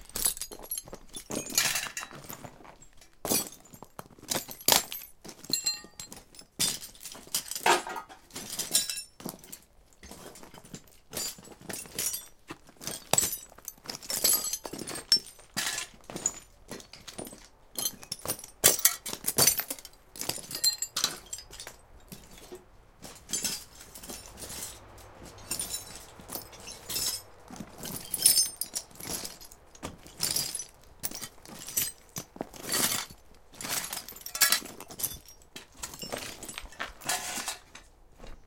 recording of someone walking over the floor of a shed covered with thousands old car parts, nuts, bolts etc.
recorded at kyrkö mosse car graveyard, in the forest near ryd, sweden
metal, car, step, field-recording, parts, walk, metallic